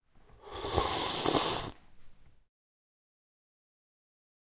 Sip - man
man sipping a drink aloud
canteen, CZ, Czech, drink, drinking, eating, food, man, Pansk, Panska, sip